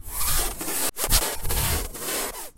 Manipulated Recording of a hissing noise being made with the teeth and lips